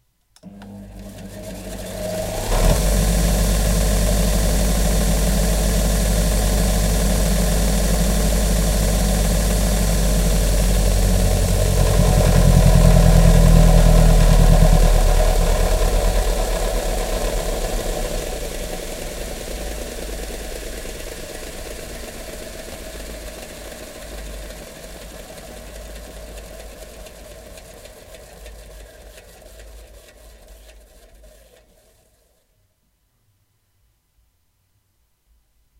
mrecord14 sanding belt edit
A Grinder running and shutting down.
belt, grinder, sanding